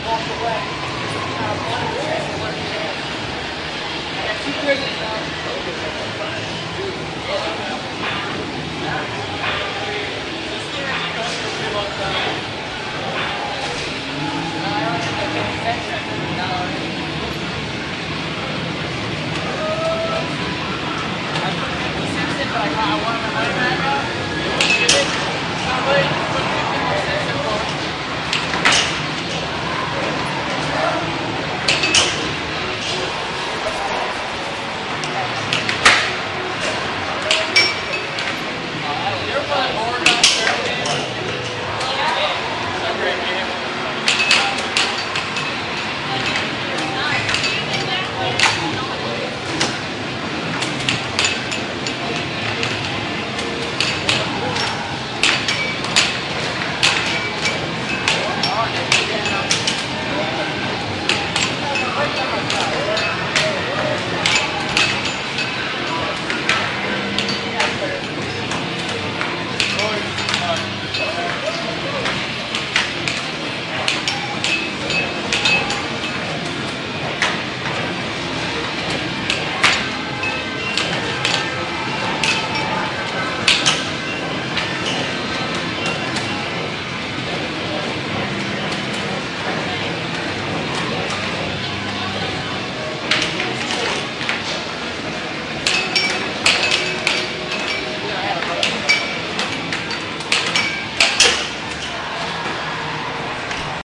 newjersey OC jillysairhockey
Air hockey game at Jilly's Arcade on the boardwalk in Ocean City recorded with DS-40 and edited and Wavoaur.
ambiance, arcade, boardwalk, field-recording, ocean-city